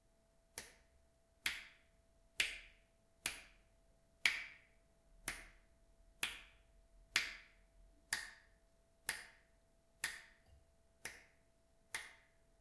Me, finger snapping